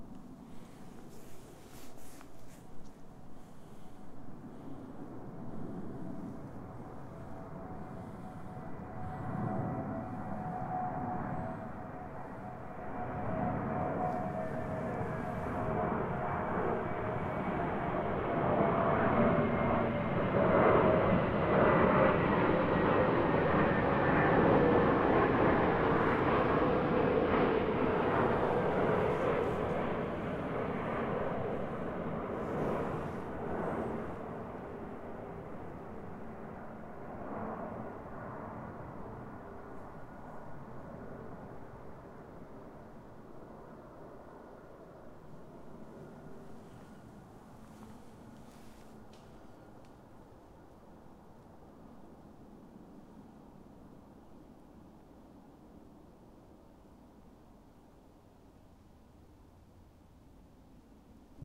Aircraft passing at low altitude before landing, engine at low regime
airplane, landing, aeroplane, plane, Aircraft